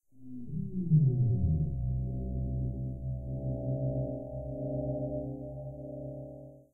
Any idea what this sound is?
wierd-wooo-sound
This could work as a game sound for a raygun powering up, or repeated as a siren. I think it originally started as a drum beat, but I really played around a lot with it before this came out in Audacity.
machine, powerup, woo